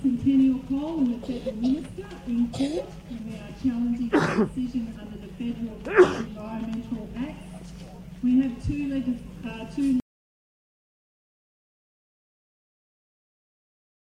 Recorded onto a minidisc at a protest to save Anvil hill in Australia from more mining endevours. Hahaha.
field, protest, english, man, australian, sneeze